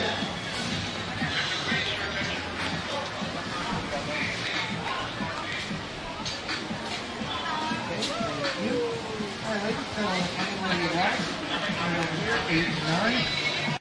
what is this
At a hotdog shack across from Mariner Arcade on the boardwalk in Wildwood, NJ recorded with DS-40 and edited in Wavosaur.